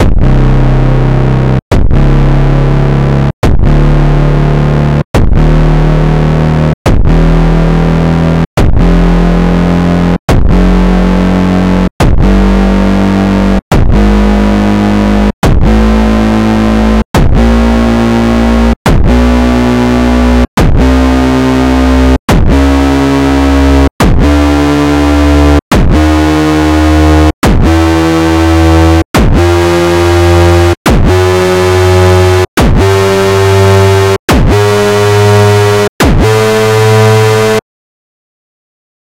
These are a series of Distorted kicks. Starting from C3 going up to A4 I hope you find these useful :D IF you make something out of these submit a link so I could hear it :D
Distort, Distorted, Distortion, Drum, Hardcore, Hardkick, Hardstyle, Jumpy, Kick, Tuned